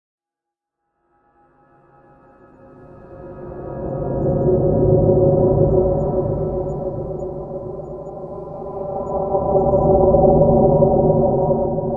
ab ghost atmos
a spacey sound sounds like something in the sky